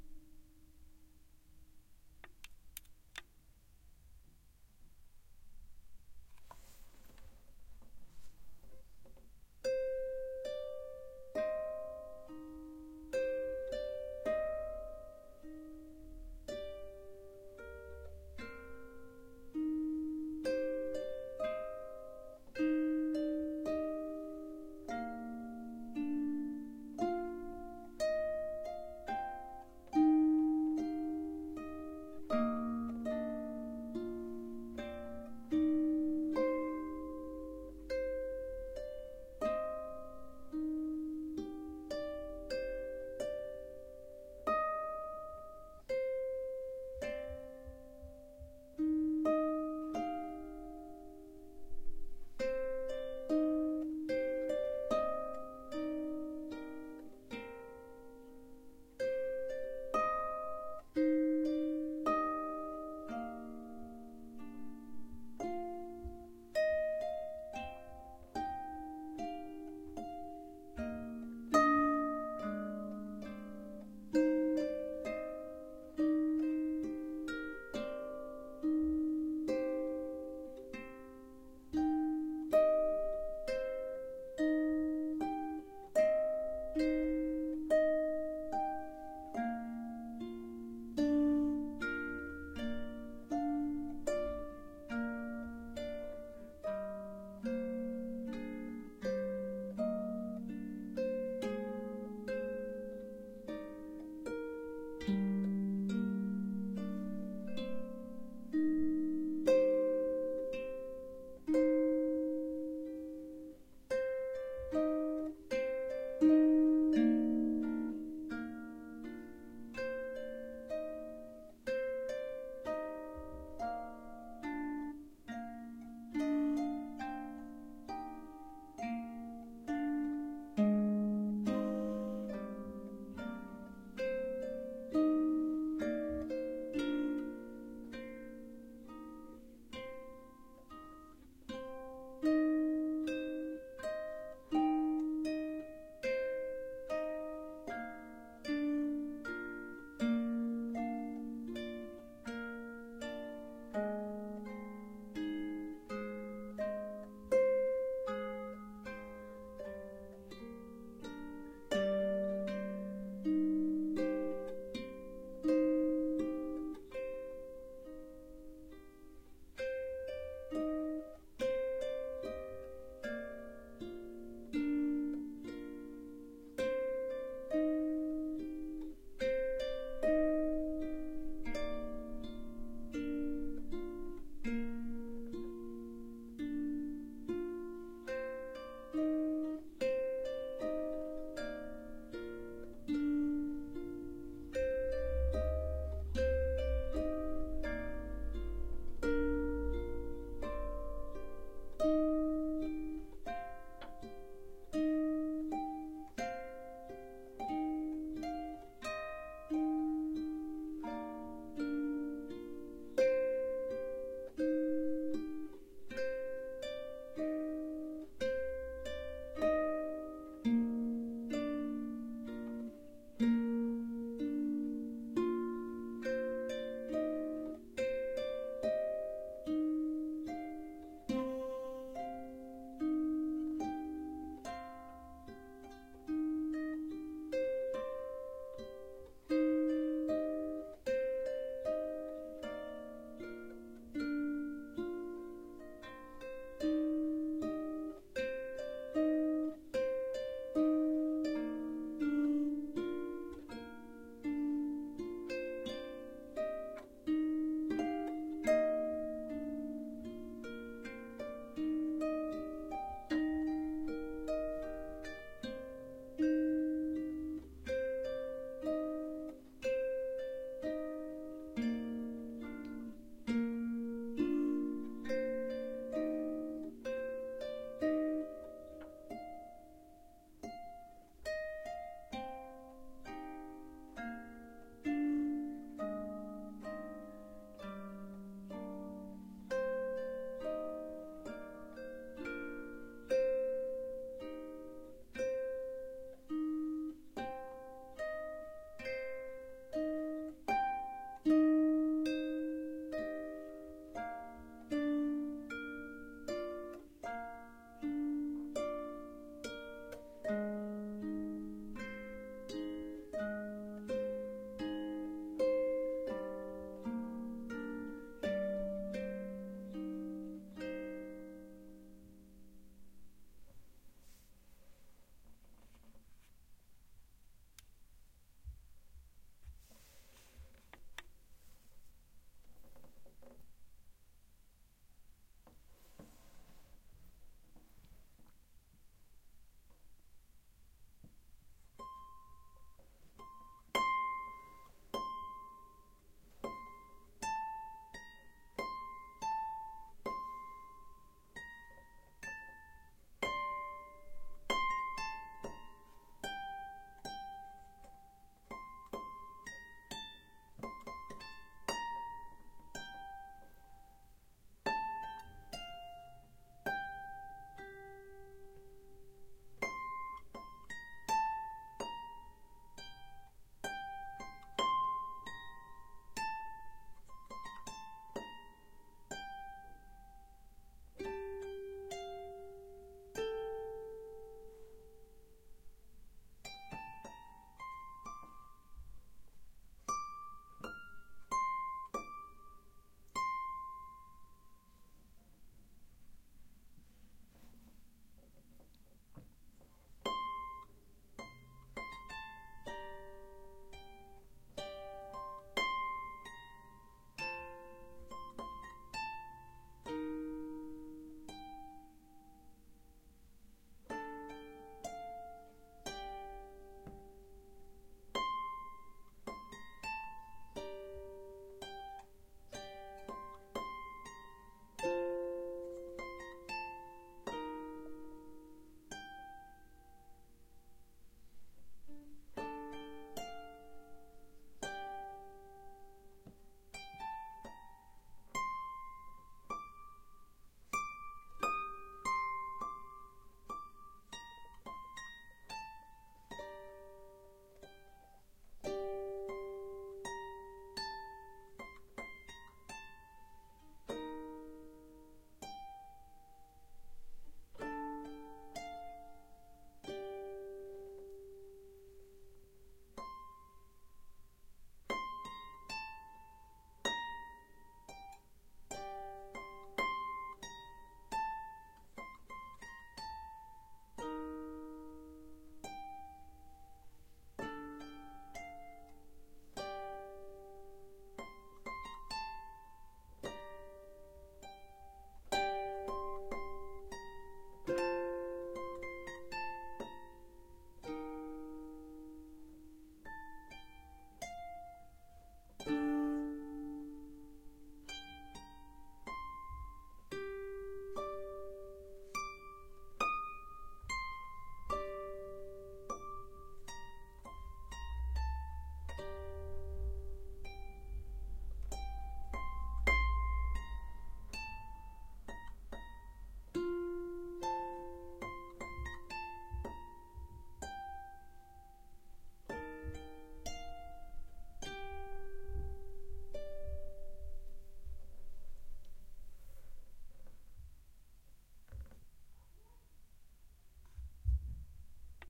Harp Improvisation Just Take the pieces you need Raw from recording
Harp, Strings, Koto, Plucked, Ethnic, Harfe, Improvisation